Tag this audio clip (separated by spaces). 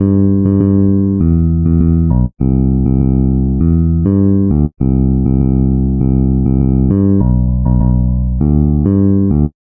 bass dance electro electronic loop synth techno trance